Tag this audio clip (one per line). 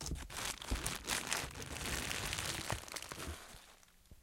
crumple
cloth
paper
plastic
environmental-sounds-research